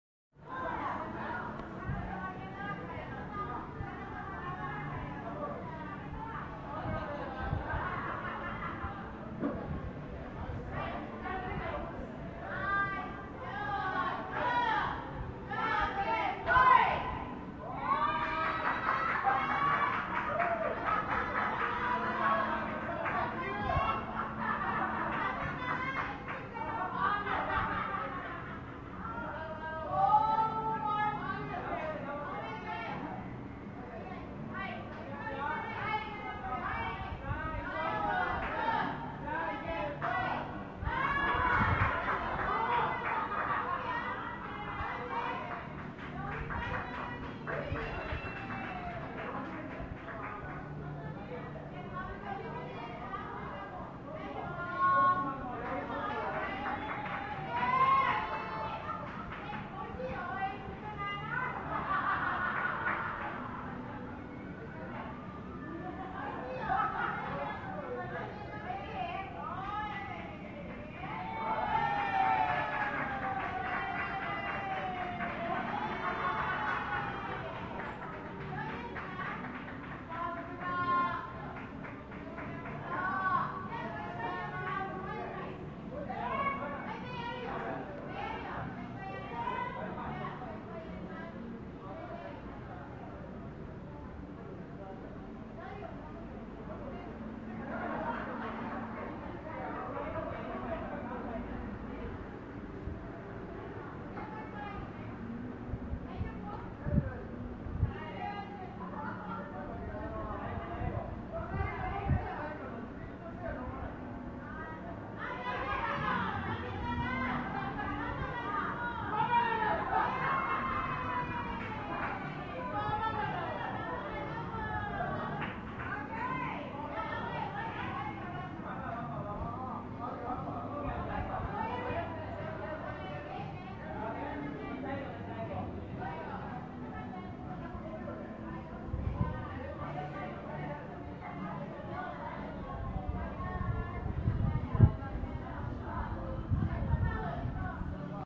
Japanese drinking game
A drinking game from a bar in American Village in Okinowa.
Freelance Android developer and indie game dev.
ambiance, ambient, field-recording, japan, street